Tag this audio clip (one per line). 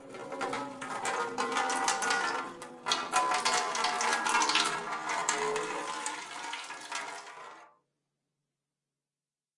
metal
pail
fountain
spit